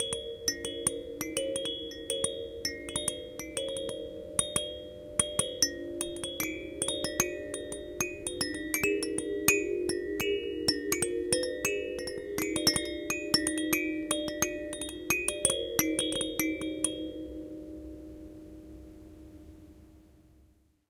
baby bell rattle 03
A baby bell/rattle. Recorded using a Zoom H4 on 12 June 2012 in Cluj-Napoca, Romania. High-pass filtered.
rattle, toddler, toy, baby, child, bell